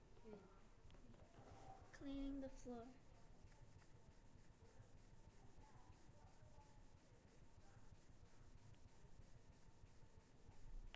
sonicsnaps GemsEtoy davidcleaningthefloor
cleaning the floor
Etoy, sonicsnaps, TCR